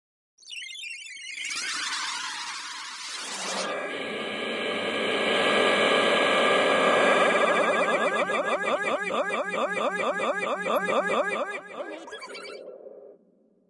Oi oi oi
A short and very mangled vocal sample sounding a little crazy - part of my Strange and Sci-fi 2 pack which aims to provide sounds for use as backgrounds to music, film, animation, or even games.
crazy, electro, electronic, processed, sci-fi, voice